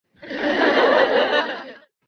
Laugh Track 4
Apparently I made this for my animation which supposedly a parody of sitcom shows...and since I find the laugh tracks in the internet a little too "cliche" (and I've used it a bunch of times in my videos already), I decided to make my own.
So, all I did was record my voice doing different kinds of laughing (mostly giggles or chuckles since I somehow can't force out a fake laughter by the time of recording) in my normal and falsetto voice for at least 1 minute. Then I edited it all out in Audacity. I also resampled older recordings of my fake laughters and pitched down the duplicated tracks so that it would sound "bigger".
Thanks :)